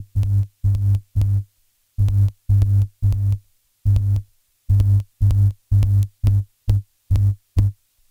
Bass Hits with Crackle
Series of bass hits and crackle generated from a No-Input Mixer